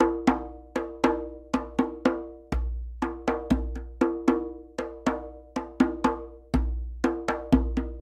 djembe grooves diansa 120bpm
This is a basic Diansarhythm I played on my djembe. Recorded at my home.